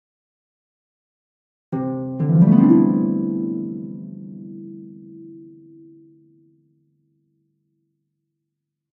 Ascending tones on a harp. Created in GarageBand.